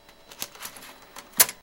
inserting floppy disc (alternative)
inserting a Floppy into the Floppydisc drive (alternative version). (direct to PC recording. No Tapemashine used)
disc, drive, floppy, floppydisc, floppydrive, inserting